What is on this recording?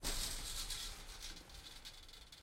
Metal fence hit 2
Metal fence hit